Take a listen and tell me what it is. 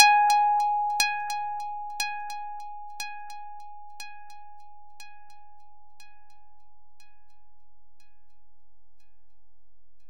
String echo
echo, guitar